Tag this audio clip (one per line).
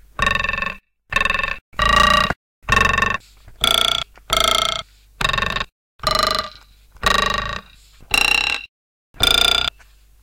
garage
hand
home
melody
tools